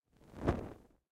25 FIACCOLA PASS
effects, fiaccola, torches